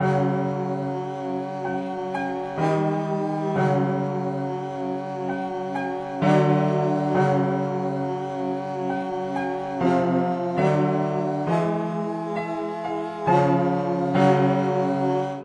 A little tune I made using FL.
If you used it pleas link me the content you used it in.
Thank you :'3
I hope this was usefull.
terror, drama, Gothic, spooky, haunted, dramatic, background-sound, phantom, creepy, bogey, suspense, atmos, thrill, terrifying